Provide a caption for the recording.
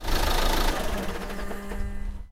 Raw audio of a Renault Grand Scenic car being turned off.
An example of how you might credit is by putting this in the description/credits:
The sound was recorded using a "H1 Zoom V2 recorder" on 18th April 2016.